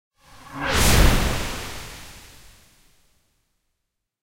A very swift whoosh effect
swift, sfx, swoosh, fx, transition, whoosh